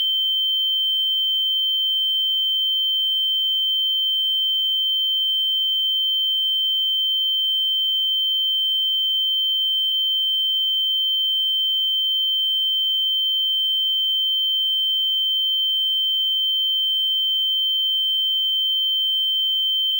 tinnitus ringing ears hearing impaired impairment ear white noise sound
ear, impairment, white, impaired, sound, tinnitus, noise, hearing, ringing, ears